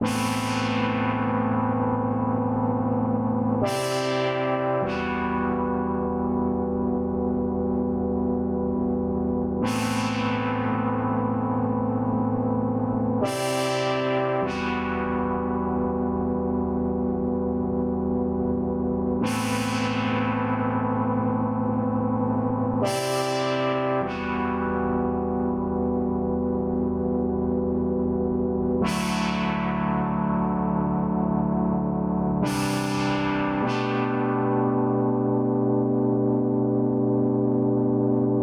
synth organ2
organ synth line-
synth, organ, techno, house, pad, electro